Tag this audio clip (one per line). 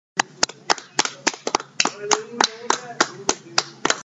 Clapping
Crowd
Talking